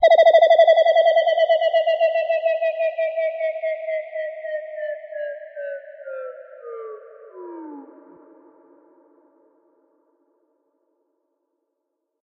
Distant Space Sweep
sweeper, rising, sweep, riser, sweeping, fx, sound-effect, effect